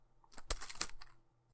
grasswalking step1
The sounds of a crunching footstep through a forest.
Created by recording and layering multiple recordings of tinfoil being hit and moved about.
foot, footsteps, forest, grass, mud, step, sticks, walk, walking